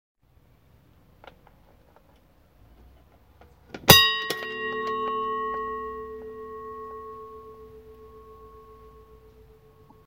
Old bulb shaped bell with metal arm and chain to pull and ring.